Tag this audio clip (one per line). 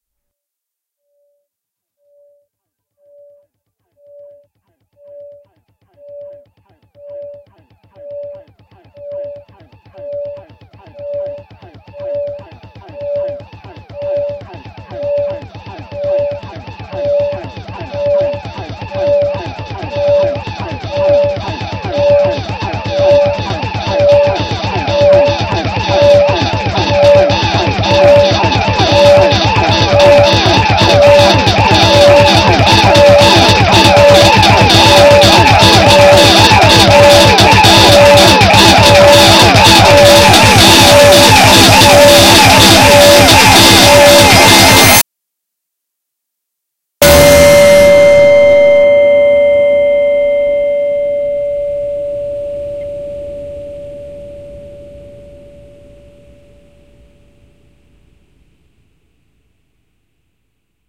big-bang
conglomerate